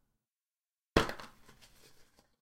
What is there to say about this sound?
#6 Hard Poke
Hard, hit, impact, Poke